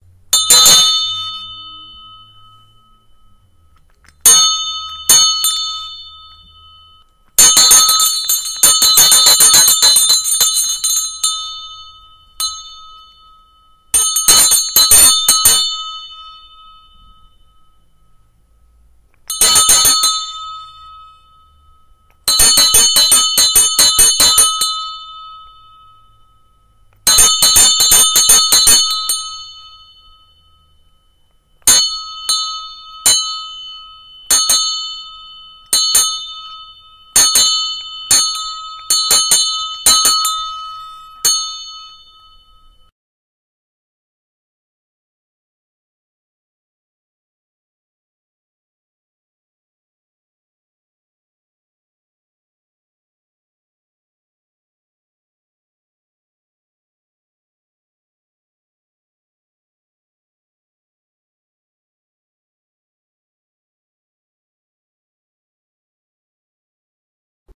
School Clock Ringing
Ringing of clock
Ringing, Clock, School